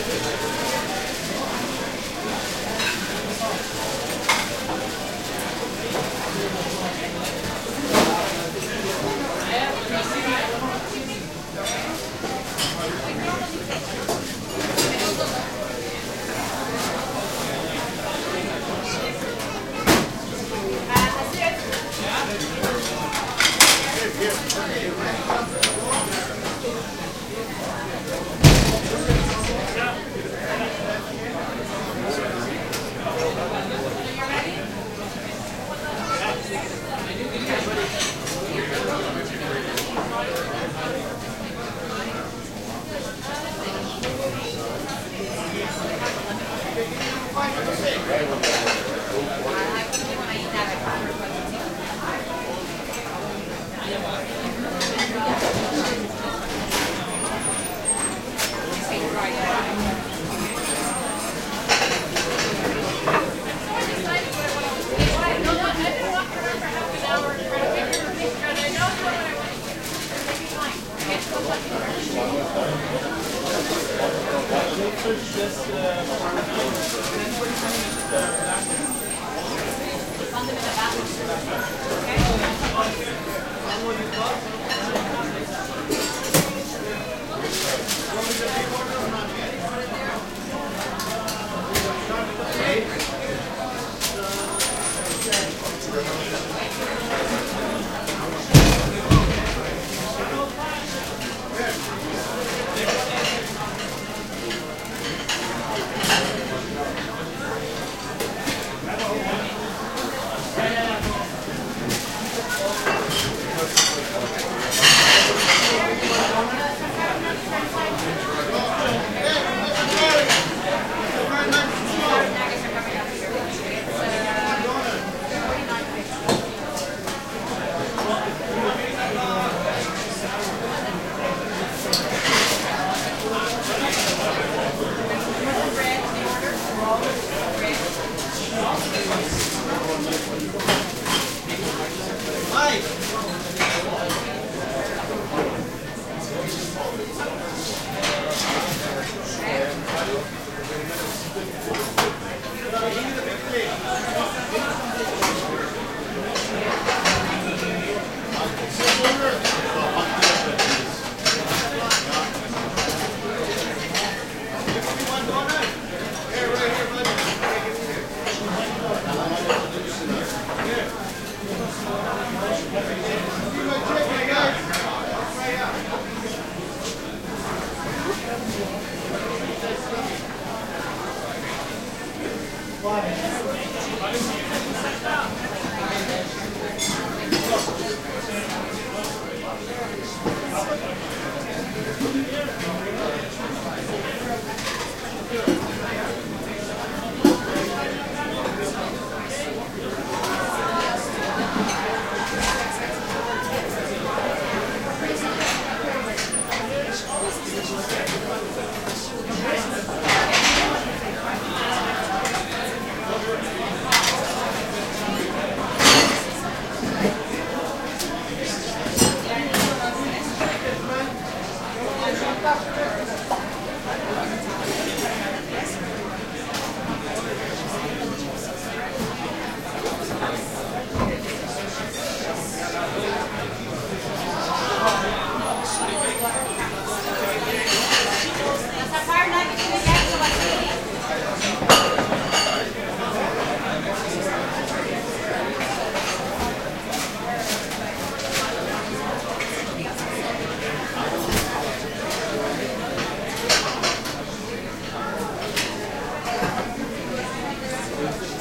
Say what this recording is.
restaurant diner busy entrance cash, kitchen Montreal, Canada
busy, Canada, cash, diner, entrance, kitchen, Montreal, restaurant